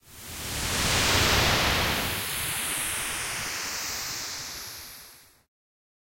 By request. A whoosh. 6 in a series of 7 - similar to "whoosh06" but a longer build - similar long tail, and maybe even larger in soundI took a steady filtered noise waveform (about 15 seconds long), then added a chorus effect (Chorus size 2, Dry and Chorus output - max. Feedback 0%, Delay .1 ms, .1Hz modulation rate, 100% modulation depth).That created a sound, not unlike waves hitting the seashore.I selected a few parts of it and added some various percussive envelopes... punched up the bass and did some other minor tweaks on each.Soundforge 8.
effect, electronic, fm, soundeffect, synth, whoosh